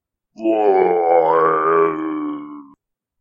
Zombie Die 3
Sound of a dying zombie